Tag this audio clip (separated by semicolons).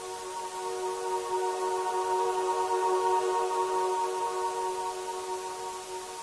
mystic; ambient